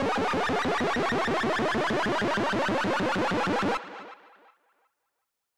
Synth chiptune 8 bit pitch down build up
chiptune, up, bit, Synth, 8, pitch, rise, build